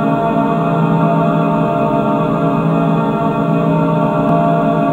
Created using spectral freezing max patch. Some may have pops and clicks or audible looping but shouldn't be hard to fix.
Atmospheric, Background, Everlasting, Freeze, Perpetual, Sound-Effect, Soundscape, Still